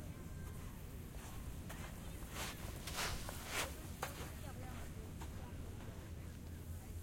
Steps sand beach
Field recording of 12 steps on the gravel approaching and going away. There is the background noise of the beach of Barcelona.
field-recording, gravel, sand, steps